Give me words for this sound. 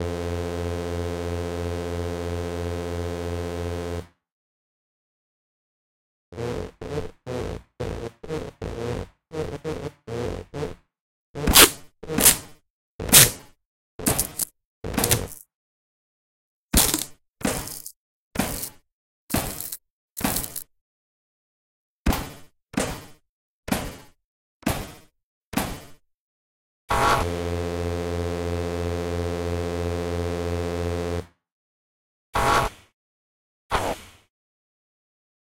Energy Sword
Laser, Sword, Energy-sword, Electricity, Sci-Fi, Lightsaber, Beam